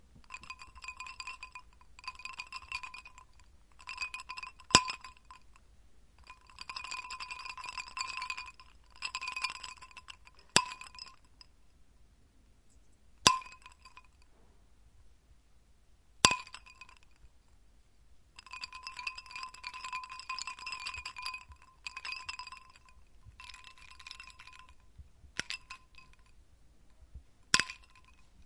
Stirring iced water in a glass.
Recorded with Zoom H2. Edited with Audacity.